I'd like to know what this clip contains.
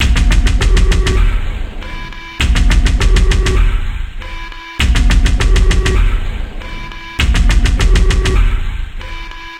just the beat of 023
used coldfire distortion for the distort, used dblue glitch VST to make the glitches, FL7XXL used as a VST host. made this for a pile of samples i've given out for projects and nothing has come out of them, so i'm giving it out to everyone and anyone now. 100 BPM.